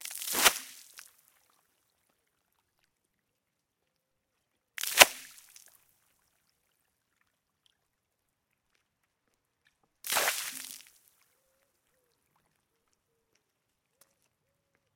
Water on concrete
A bucket full of water reversed on a concrete floor, recorded close with a Neuman KM185 outside.